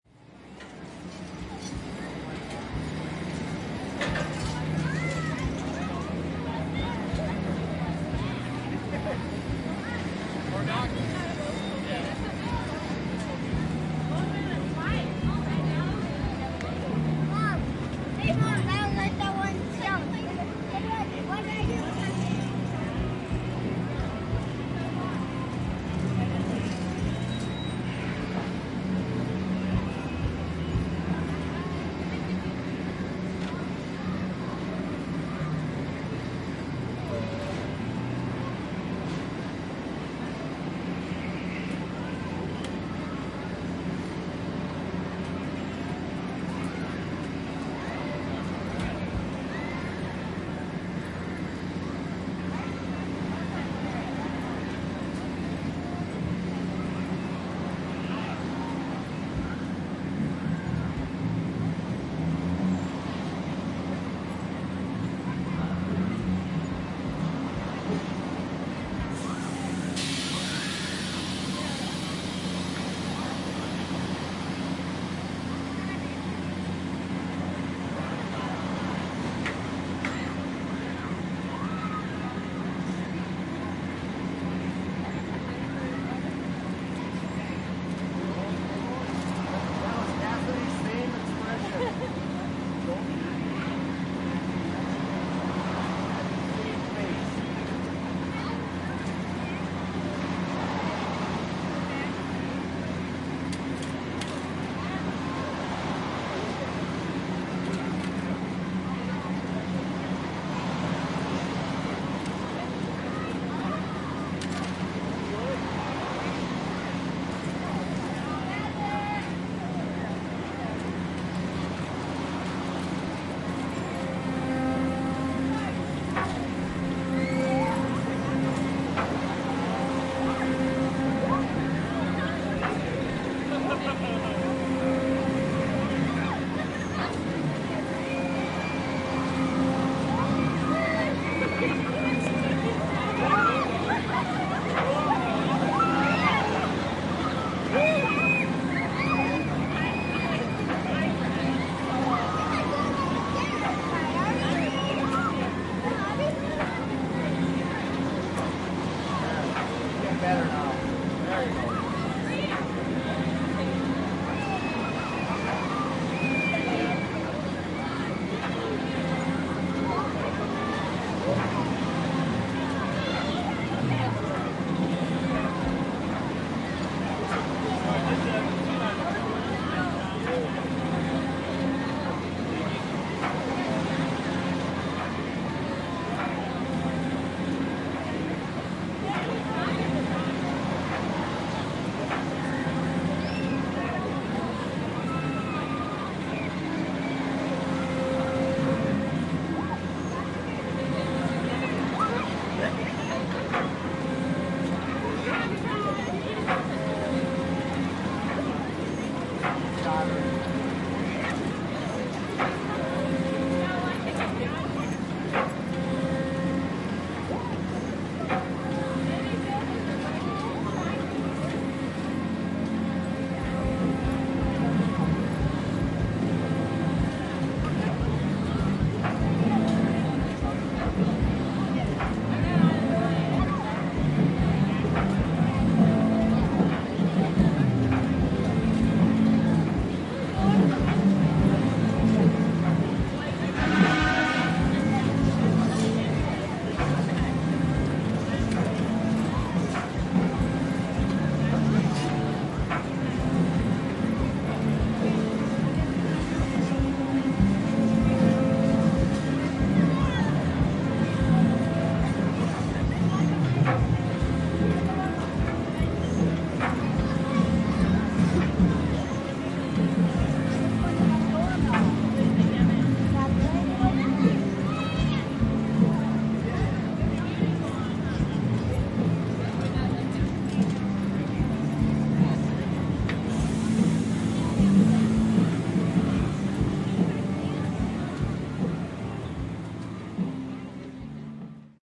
Fair midway with crowd chatter, carnival rides and a band soundcheck
The ambience of a carnival midway or the local fair. This sound includes the compressed air pistons of a carnival ride, some passenger screams, conversation from observers, and the whine of the ride's hydraulics as it moves. At about 3:45 the warmup band in the nearby grandstand starts rehearsing a song, and a loud air horn at about 4:10.
air-horn, ambience, amusement, background, barker, carnival, compressed-air, fair, field-recording, fun, games, generator-hum, hydraulic, live-band, live-music, midway, people, rides, soundcheck, voices